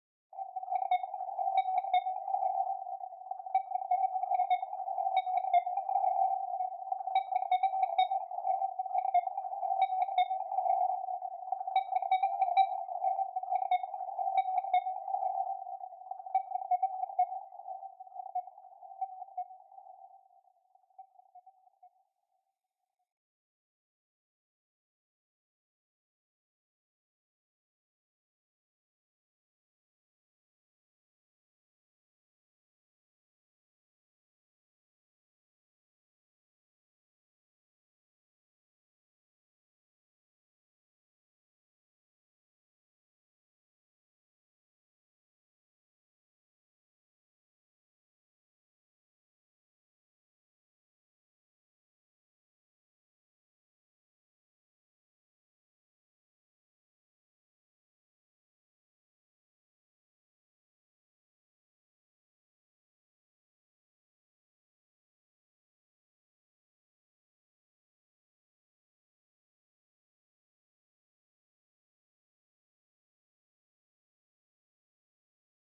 getting hazey while drinking wine
sounddesign, electric, sound-effect, experimental, sound, sfx, sci-fi, future, electronic, freaky, horror, abstract, fx, design, soundscape, weird, sound-design, effect, confused, dizzy, strange